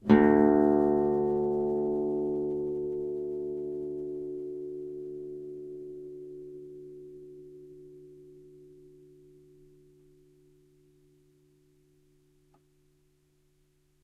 Low D, on a nylon strung guitar. belongs to samplepack "Notes on nylon guitar".